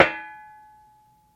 The sound of a metal folding chair's back being flicked with a finger.